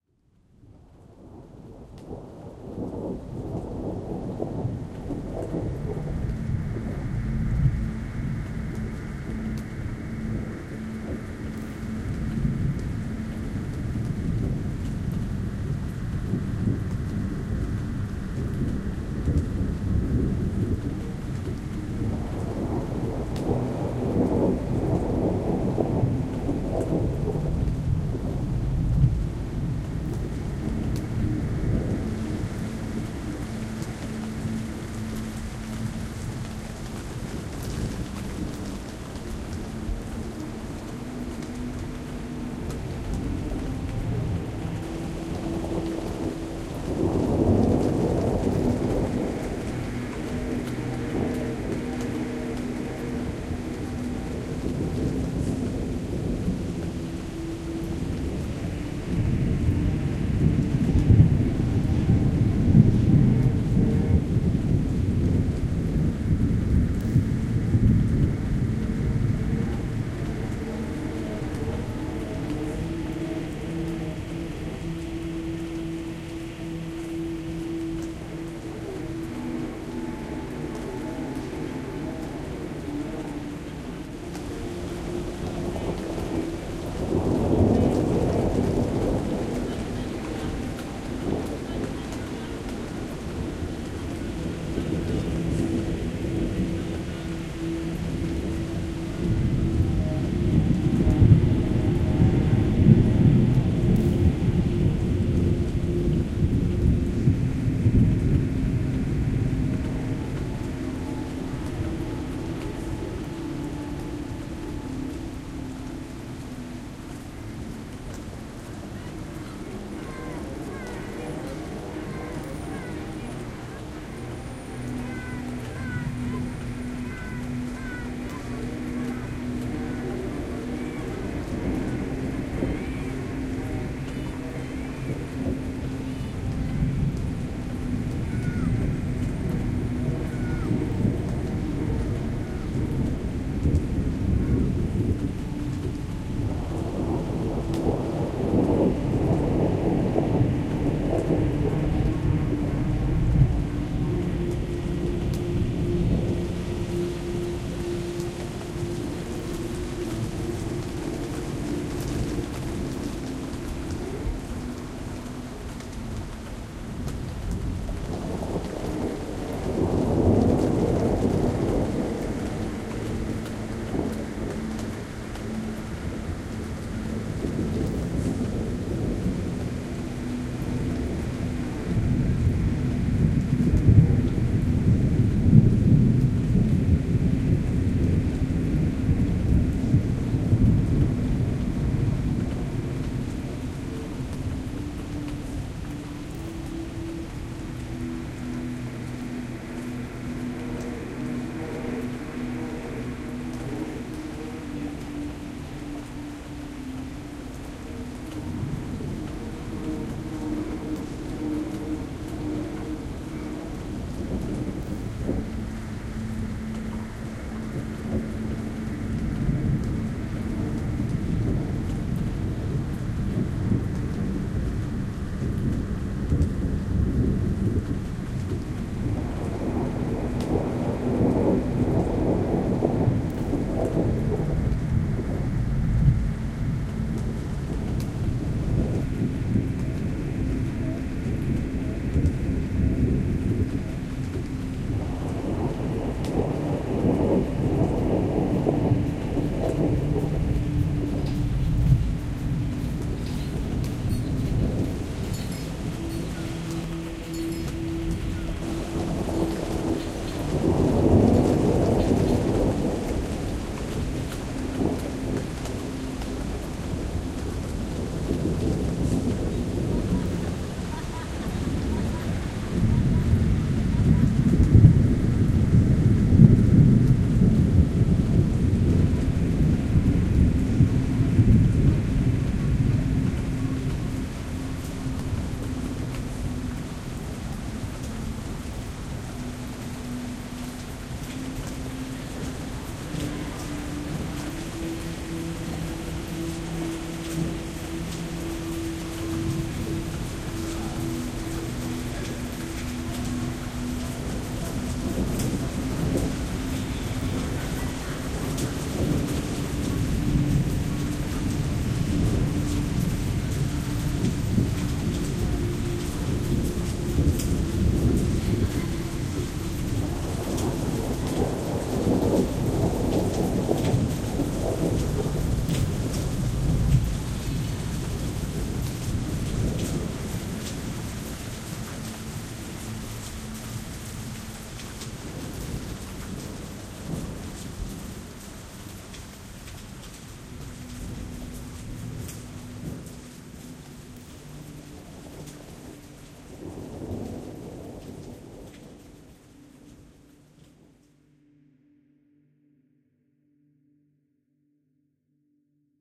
Dark background sounds
Ambience soundscape made in Cubase.
ambience, cubase, dark, deep, field-recordings, game, h2n, low-frequency, movie, nature, rain, sound, synthesis, synths, thunder, voices